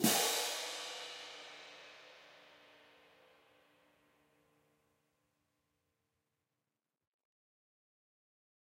Marching Hand Cymbal Pair Volume 09
This sample is part of a multi-velocity pack recording of a pair of marching hand cymbals clashed together.
percussion, orchestral, cymbals, marching, symphonic, band, crash